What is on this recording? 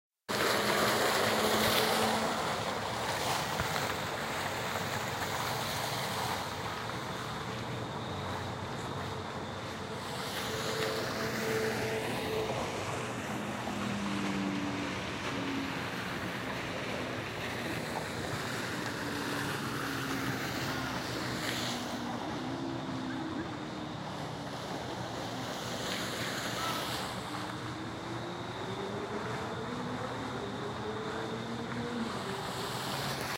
some relaxing fountain sounds
Fountain, water, outside